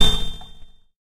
An electronic percussive stab. A burst of distorted noise. Created with Metaphysical Function from Native Instruments. Further edited using Cubase SX and mastered using Wavelab.